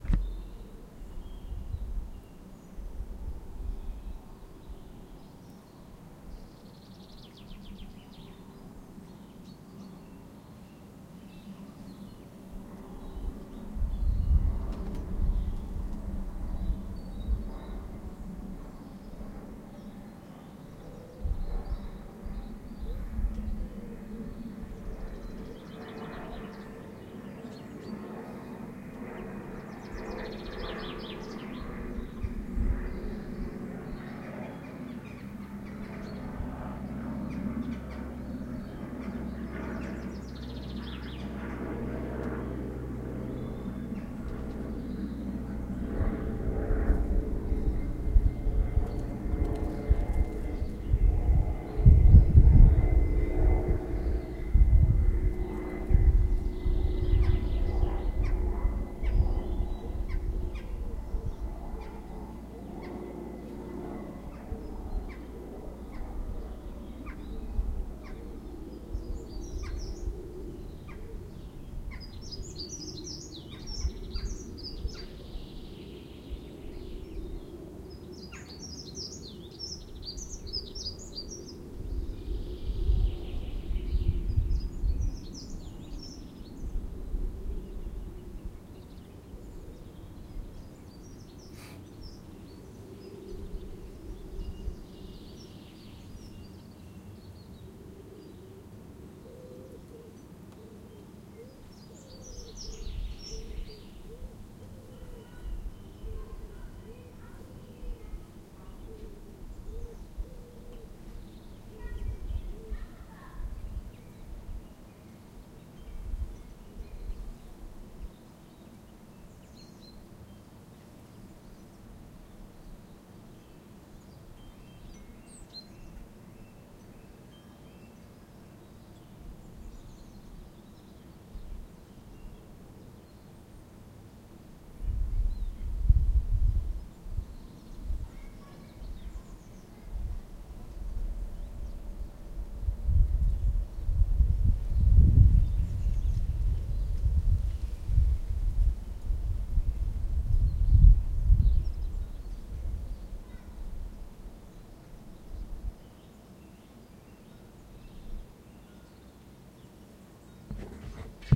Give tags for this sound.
ZoomH2; Outside; Atmo; Birds; Summer; Garden; Chimes; PropellerPlane

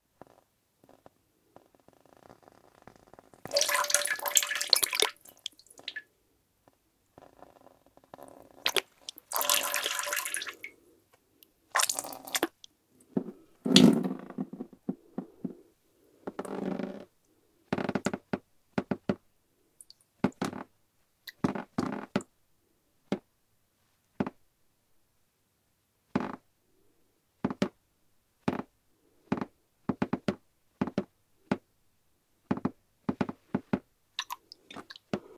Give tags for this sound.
drain; watery; gargle; drink; alex-boyesen; dripping; drips; sink; urinate; tap; pee; drops; trickle; liquid; sponge; glug; gurgle; splash; bubble; drip; ed-sheffield; pour; water; wet-cloth

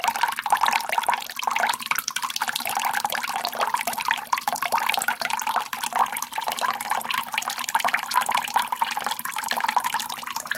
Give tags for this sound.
flowing; tap; drip; pipe; water; dripping

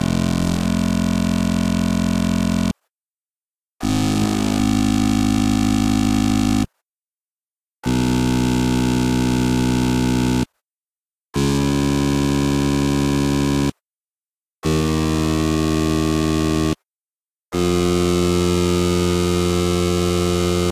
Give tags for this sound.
monotron-duo; mda-tracker; bleep; tone; sub-oscillator; korg; beep; electronic; smartelectronix

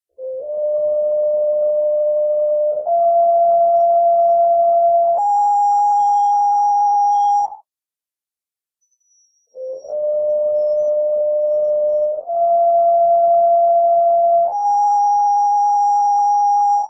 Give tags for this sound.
remix; mellow

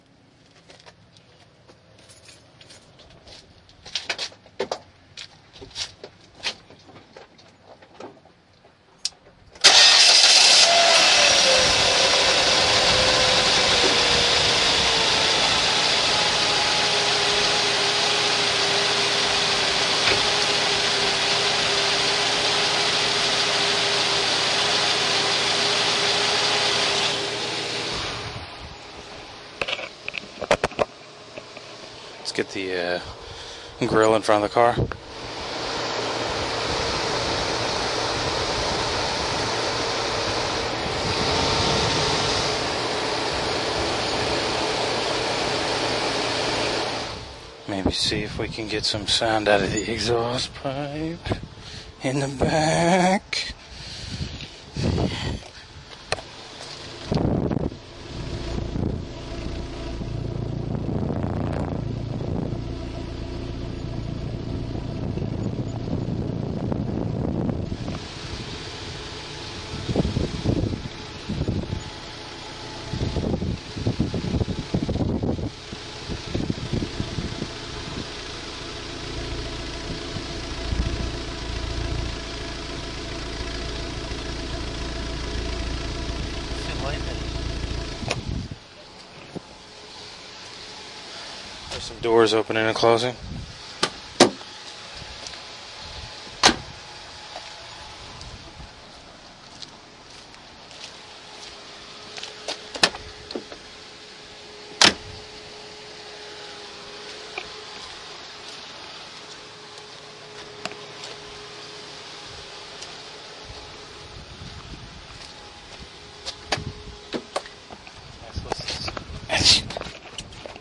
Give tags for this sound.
car; doors; field-recording; starter; stereo

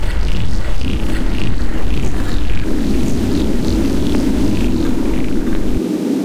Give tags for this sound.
loop; 2-bar; sustained; industrial; sound-design; electronic; rhythmic; noise

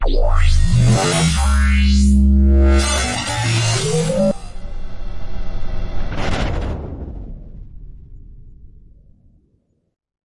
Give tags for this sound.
abstract; atmosphere; background; cinematic; dark; destruction; drone; futuristic; game; glitch; hit; horror; impact; metal; metalic; morph; moves; noise; opening; rise; scary; Sci-fi; stinger; transformation; transformer; transition; woosh

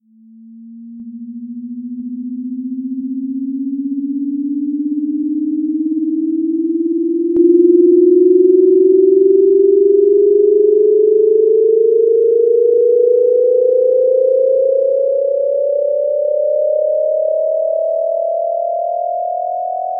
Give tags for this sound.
electronic; sci-fi; future; digital

